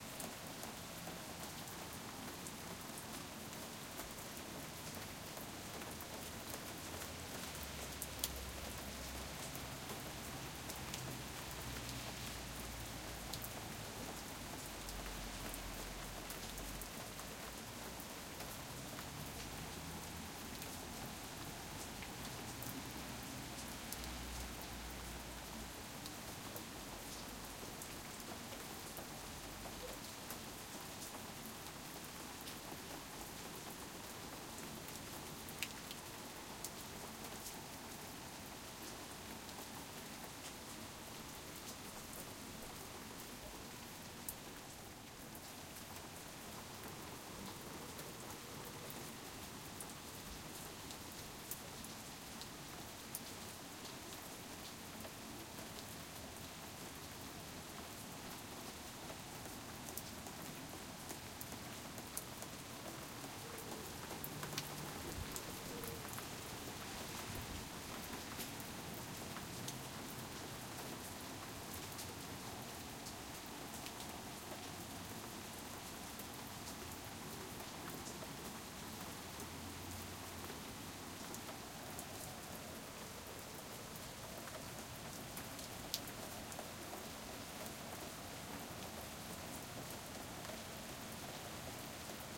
Soft Rain Ambience edlarez vsnr
ambience, rain, raining, soft, weather